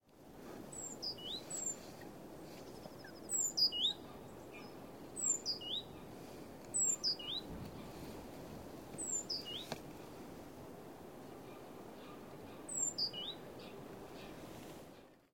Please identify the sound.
bird in oostduinen 14
Birds singing in oostduinen park in Scheveningen, The Netherlands. Recorded with a zoom H4n using a Sony ECM-678/9X Shotgun Microphone.
Evening- 08-03-2015
birds, field-recording, netherlands